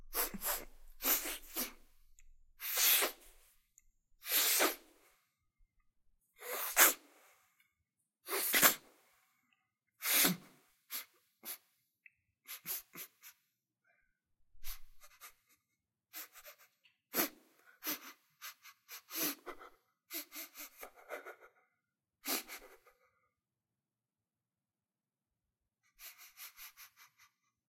sniffing sounds
Nasal breathing sounds. Sniffing and nose breathing noises. Wiping nose while inhaling like a child after crying.
breath, breathing, nose, sniffing, sniffling